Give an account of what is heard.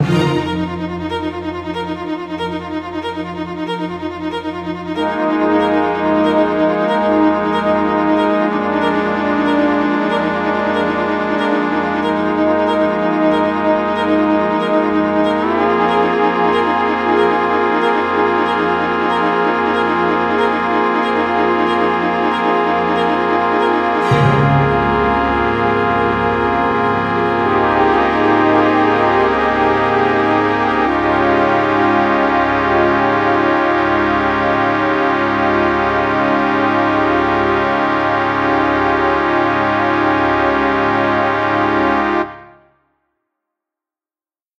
epic surprise
A short and weird musical piece suitable for using as a simple soundtrack or a music theme for non-essential stuff :)
simple, light, strings, music